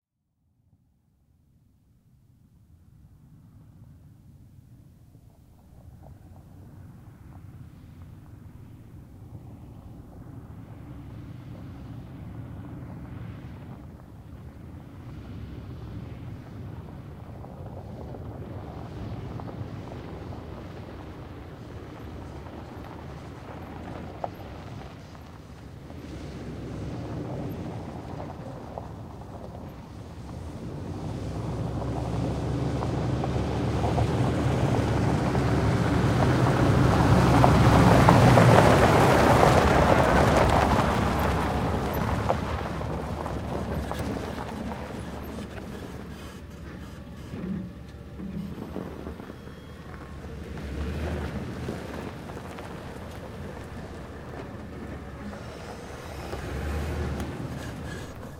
truck pickup pull up slow, reverse, and stop on gravel